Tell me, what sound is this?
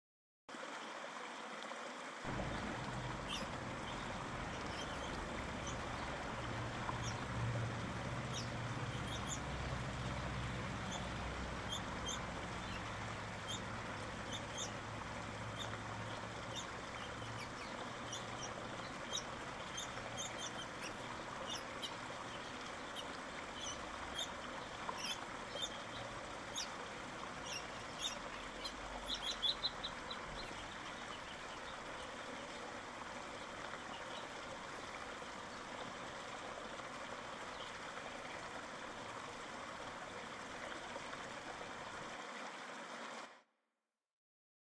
Sonidos de la Naturaleza
Sonidos de aves cantando